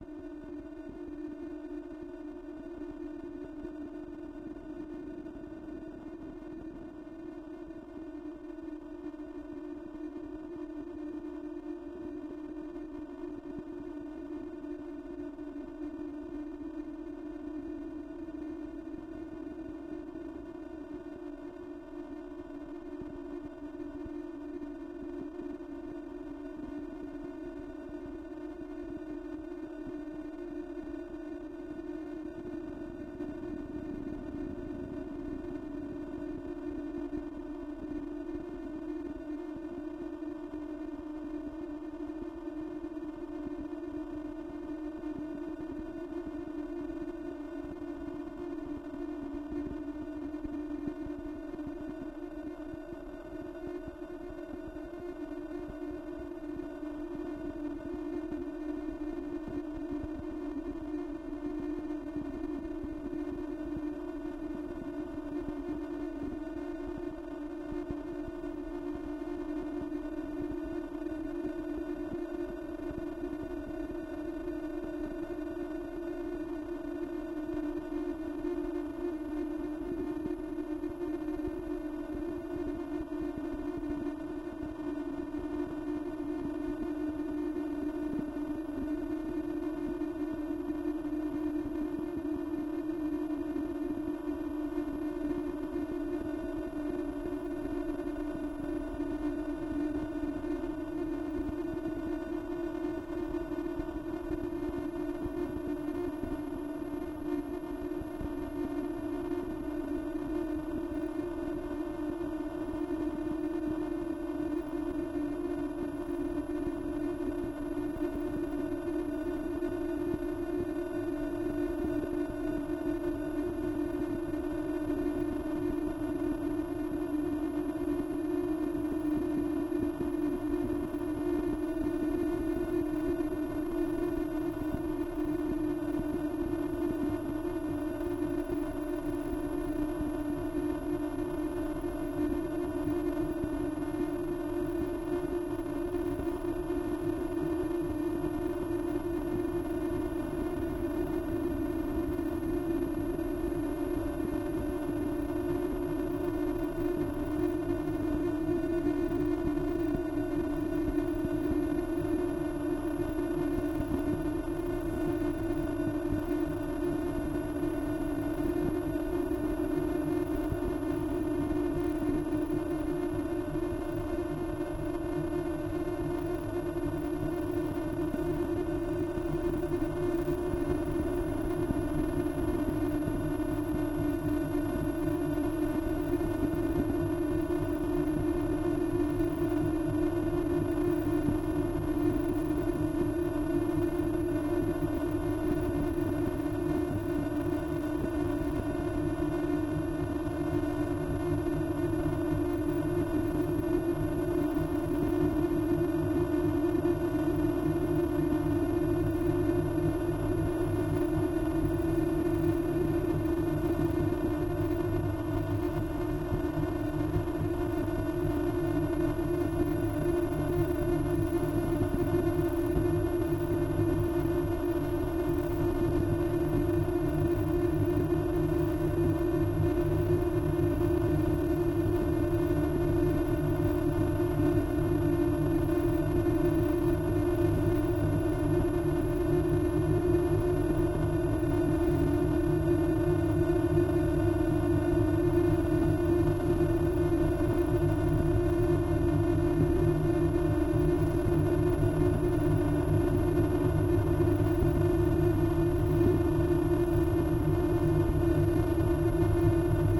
rising-tension-glitchy
Glitchy tension atmosphere.
scary, glitch, tension, unsettling, suspense, drama